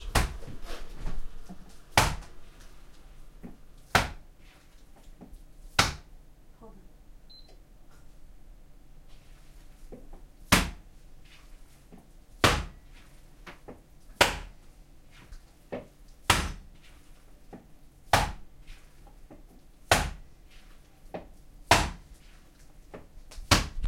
Minced meat preparation for burgers, cooking, kitchen chores
cooking, meat, minced, preparation, stressed